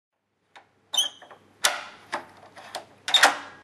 Unlocking Door
This is the sound of the door being unlocked. Made with my Canon camcorder.
locking, knob, click, sound, lock, unlocking, door